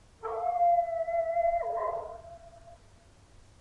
Dog howl 01
A dog howling in the distance at night. (Slightly spooky!)
creepy, distant, dog, howl, night, night-time, woods